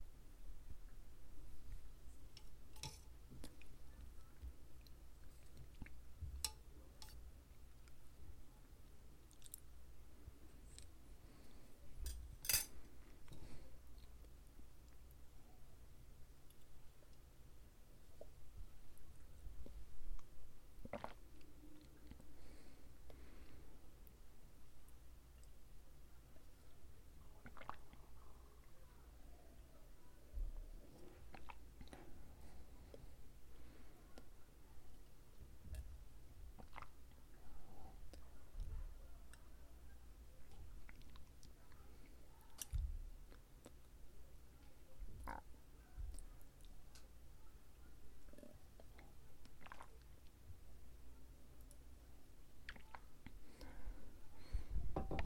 Drinking & swallowing soup
Me sipping/eating soup and water. Recorded with Tascam DR-40. I created this for a video where an actor was miming soup eating to make it sound realistic.
May 2015
eating, swallow, eat, swallowing, soup, water, sipping, drink, liquid, drinking, gulp